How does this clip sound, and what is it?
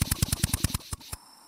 Pneumatic drill - Atlas Copco bv7 - Run freely end
Atlas Copco bv7 pneumatic running freely at the end of the cycle.
80bpm, one-shot, motor, work, crafts, pneumatic, tools, concrete-music, labor, drill, atlas-copco, air-pressure, metalwork, 2beat, pneumatic-tools